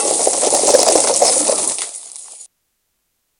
this sound can be used for freeze scenes or things like that, you know, and for this i use a series of sounds :)